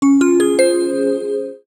Level up game sound.
Created using VSTs.